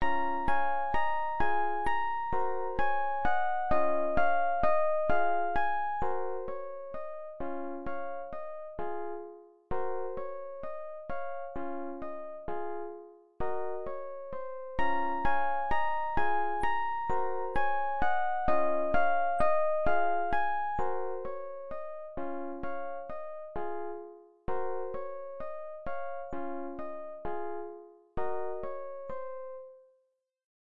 Potion seller
piano loop made using fl keys.would most likely work well as a loop track in a game. (or whatever use you may find for it )